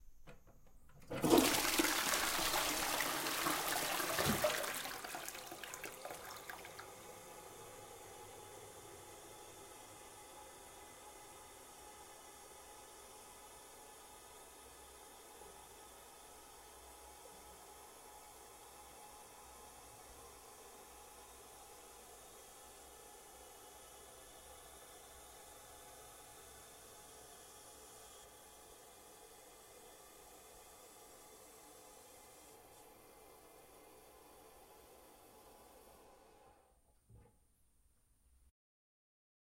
Toilet Flush Refill
FLUSH, REFILL, TOILET